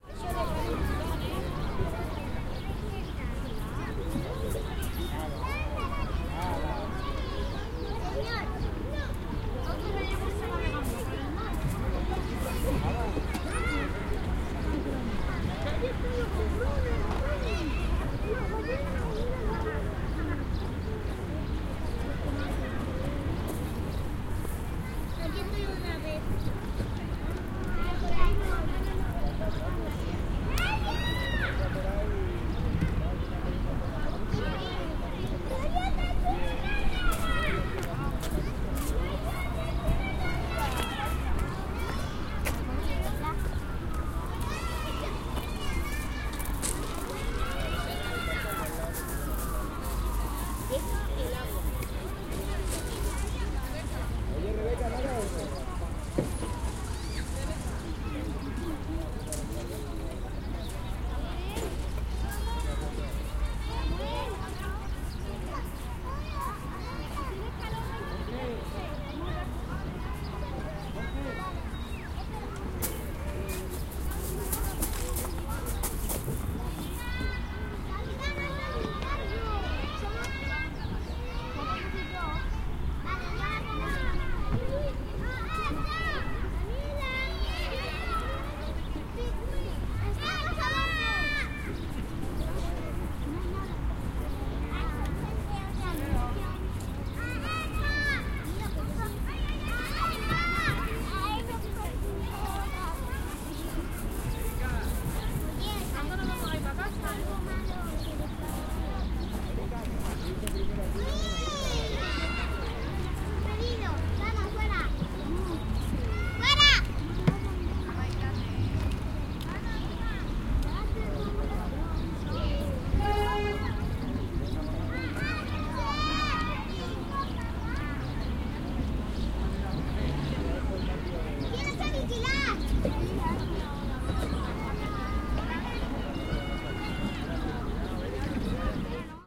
0194 Parque del Rodeo
Children, kids, and parents in a park playing. Birds. Traffic in the background. Ambulance siren in the background.
20120324
spain, ambulance, horn, field-recording, voice, birds, caceres